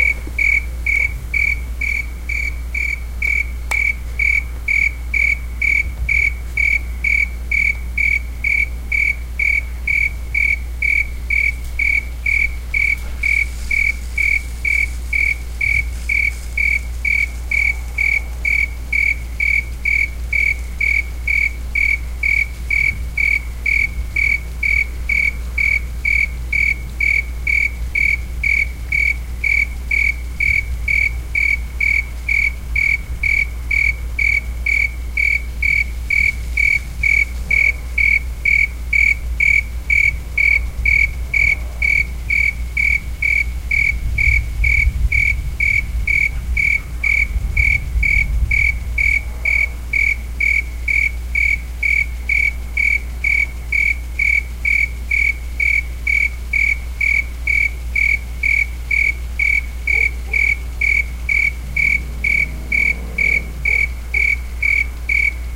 2016-08-05-Sprinkler-Car-Crickets-Dog-Thunder
Ambient recording made at night. One can hear a cricket, a sprinkler, car, thunder, and a dog barking.
Sonic Studios DSM-6 > Sony PCM-M10.
ambience, car, crickets, night, sprinkler